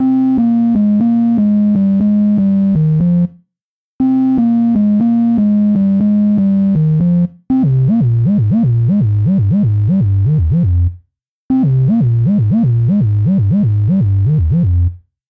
These loops are all with scorpiofunker bass synthesiser and they work well together. They are each 8 bars in length, 120bpm. Some sound a bit retro, almost like a game and some are fat and dirty!
These loops are used in another pack called "thepact" accompanied by a piano, but i thought it would be more useful to people if they wanted the bass only.